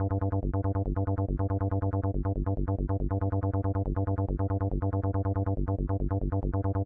140 Bass Synth
Here's a quick base synth i made in Pro tools. I created the sound using the xpand2 plug in. the bass synth is just a held G2 and i play G1 on the 2nd, 3rd and 4th beat. It's 4 bars in length. The sample is played at a Bpm of 140 in 4/4.
No editing outside of xpand2 i.e. no eq/compression
quantized, bass-synth, Bass, electro, 140-BPM, synth, 140, electronic, xpand2, trance, loop, techno, hard, rhythmic, dance, beat, music, octave